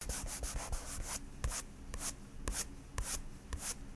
children using a marker to paint on a piece of paper